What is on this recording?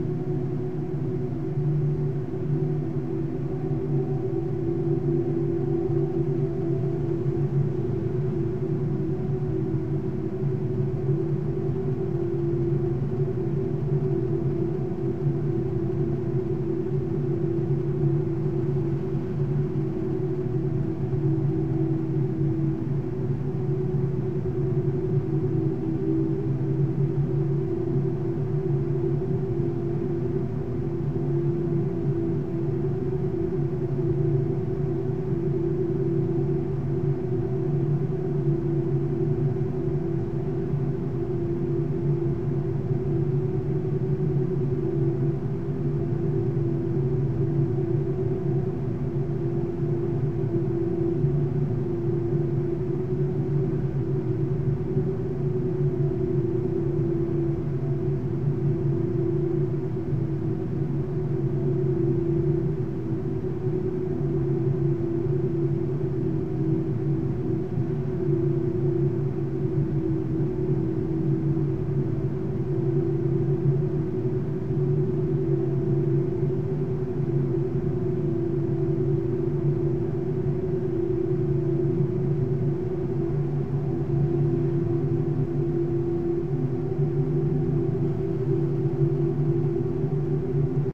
Another in the Drone series. Howling winds and drafts recorded in the basement elevator shafts of a deserted industrial building.